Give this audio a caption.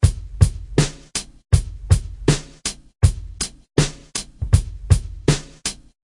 basic beat
just a basic drum loop in 4/4 made with samples and loaded into ReDrum.Herky Jerky.